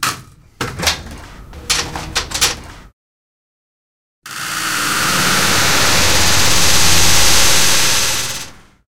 Click Clack and Delay
Click clack of a broken plastic Nerf Gun. But then... an experiment, multiple delay effects, making it sound like some mechanical-magical cloud.
Recorded with Zoom H2. Edited with Audacity.
clack, click, delay, effect, epic, plastic, sound